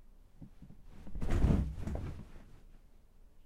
Jumping into bed 3-01.R
Falling into bed
bed, hit, jump